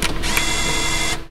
music game, disc eject
Music created in Garage Band for games. A disc ejecting. (change sort, etc.)
disc, disc-eject, eject, game, game-music, music, music-game